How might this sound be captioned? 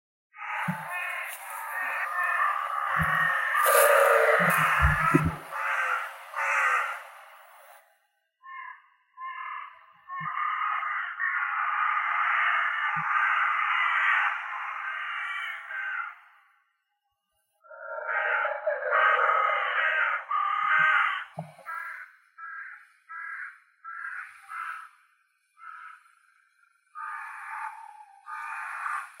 Creepy chorus of crows
Slightly creepy recording of a murder* (group) of crows in a tree. Sorry about the wind noise, phone recording, I tried to clean it up as best I could but not 100%.
*Large group of crows, look it up
birds, chilling, crows, death, field-recording, horror, nature, outdoor